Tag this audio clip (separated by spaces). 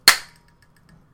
down sword heavy